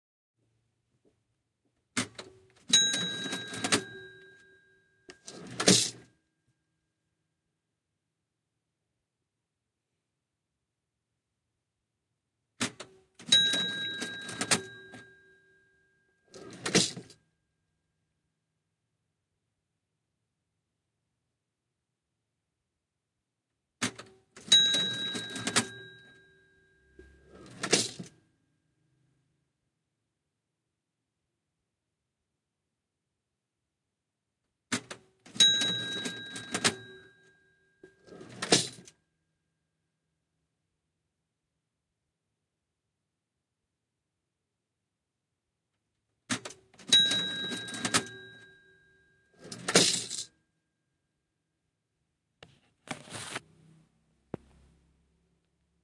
caja registradora
open and close a cash register
cash, money, register